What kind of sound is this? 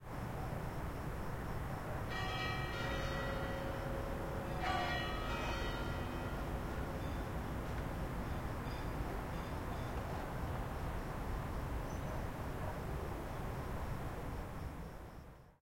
palafrugell campanes
church, dong, campanes, palafrugell, church-bell, bells, bell